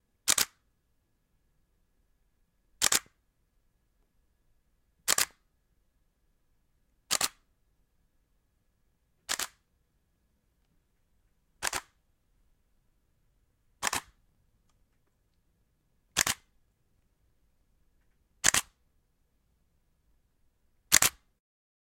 Camera Shutters 1/15th sec (Slow, dragging shutter)
The sound of my Nikon D7100's shutter with 70-300mm lens attached. Recorded with the Blue Yeti Pro with the camera various distances from the mic.
nikon-shutter, dslr, shutter-click, dslr-shutter, photo, slr, shutter-sound, camera-shutter, camera, photography, nikon, shutter